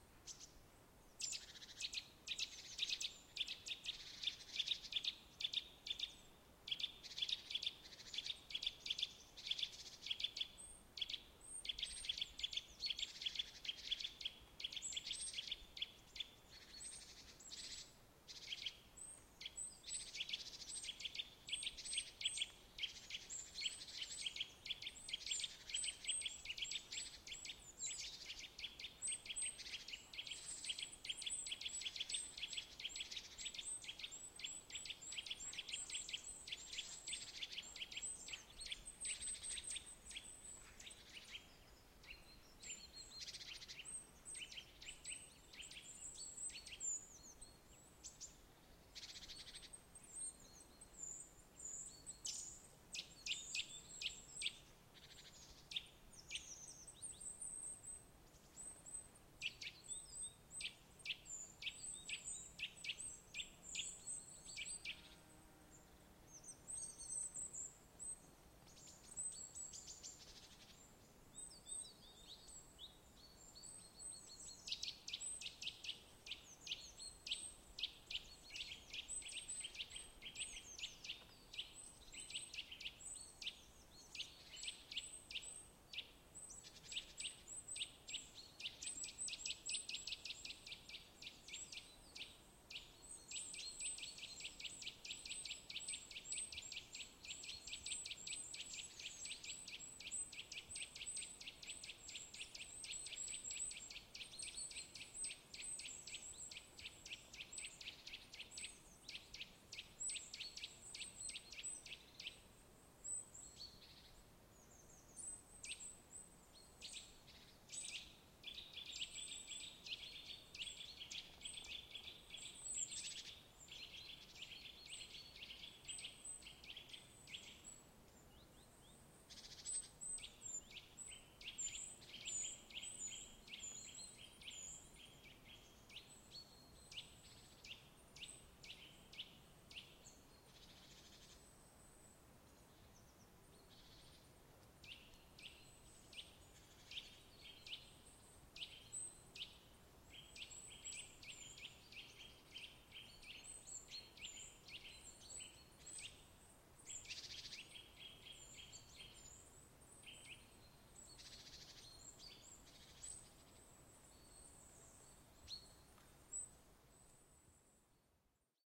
During a walk with my dog I heard many birds intensed sounded.
I found out it`s the nuthatch sounding strongest and most intense.
And I recorded it with my
Recorder Zoom H4n pro
Microphone Sennheiser shotgun MKE 600
Triton Audio FetHead Phantom
Rycote Classic-softie windscreen
Wavelab